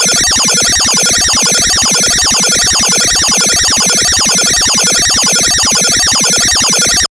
quantum radio snap123
Experimental QM synthesis resulting sound.
drone, experimental, noise, sci-fi, soundeffect